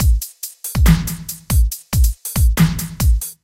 On Rd loop 5
on-road, on-rd, 8-bar, dub-step, 140-bpm, hip-hop